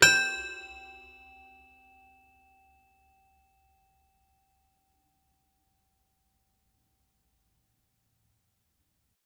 A single note played on a Srhoenhut My First Piano. The sample name will let you know the note being played. Recorded with a Sennheiser 8060 into a modified Marantz PMD661.